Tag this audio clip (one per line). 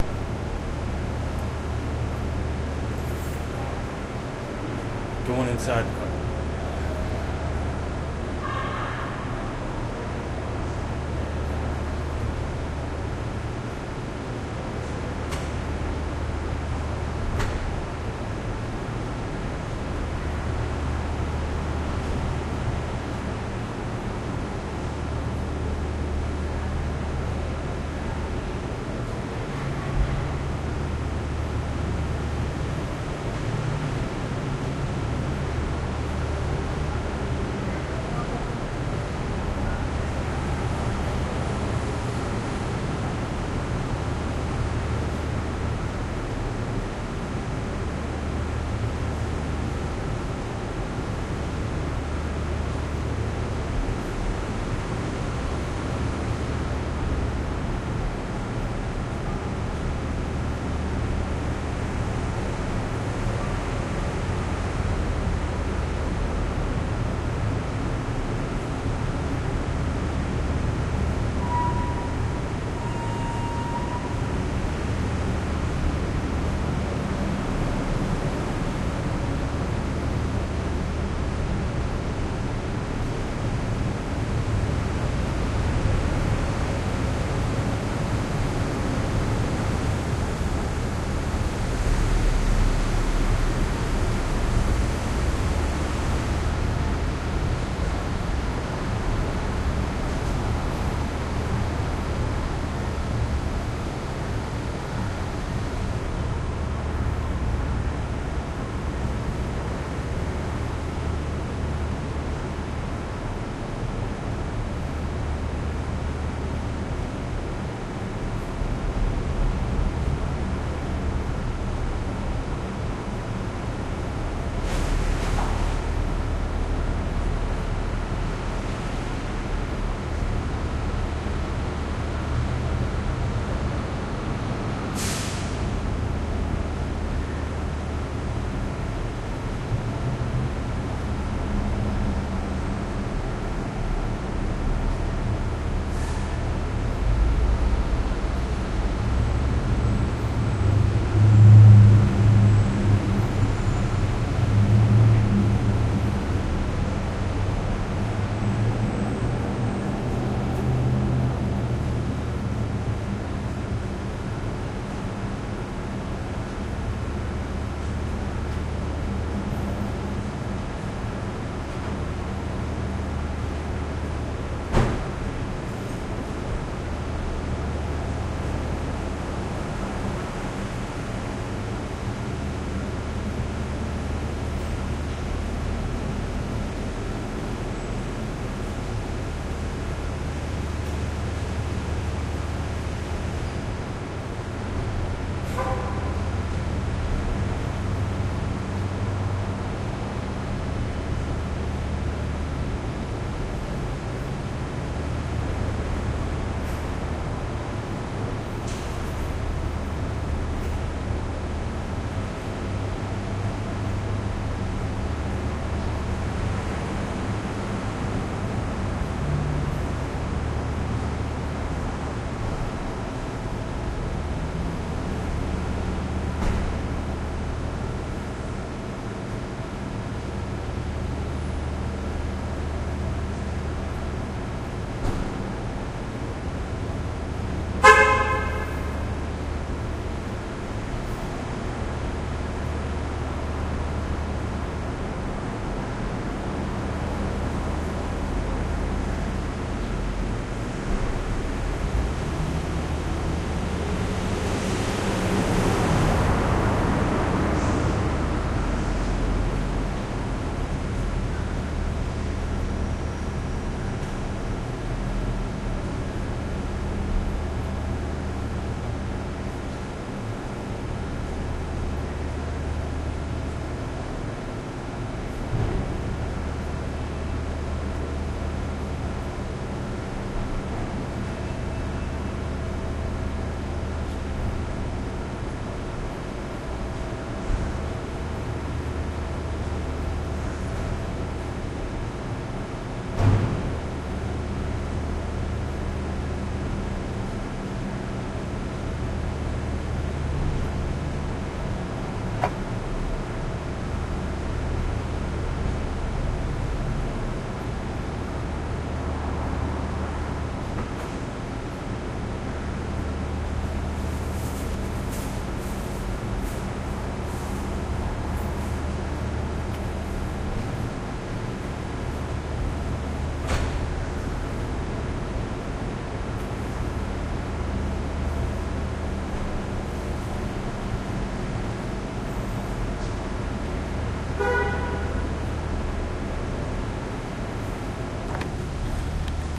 parking
morning
garage
traffic